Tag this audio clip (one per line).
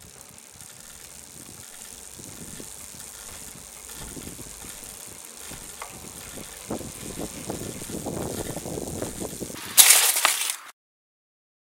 Crash
Skid
Mountain-Bike